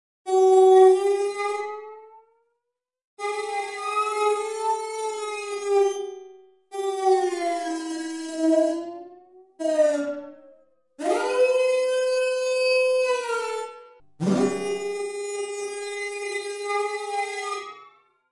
the whinger
Scratching, scraping, whingeing, virtual violin.
atmosphere, music, strings, synth